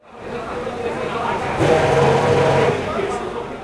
F1 BR 06 Engine Starts 10
Formula Brazil 2006 race. Engine starts. "MD MZR50" "Mic ECM907"